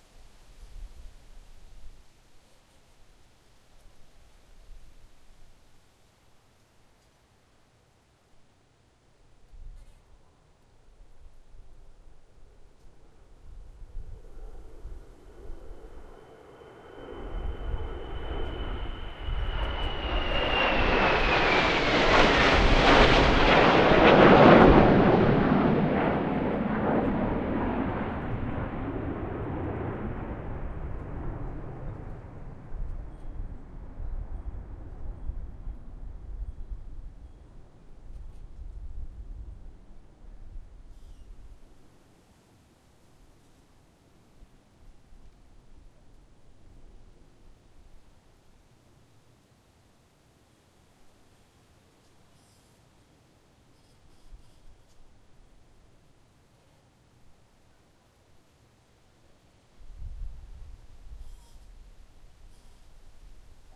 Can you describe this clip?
F-18 hornet pass 01
3 F-18 SuperHornets did a series of flyby's directly over my neighborhood on Nov 12th 2011. I caught sound of the last 2 fly-overs with my Zoom H4
F-18, Fighter, Fly-by, Jet